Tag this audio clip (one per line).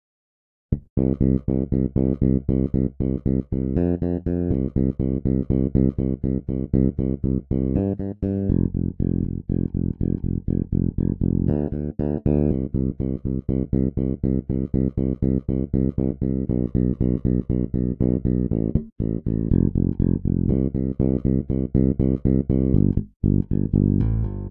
120
Bass
beat
blues
bpm
Chord
Do
HearHear
loop
rythm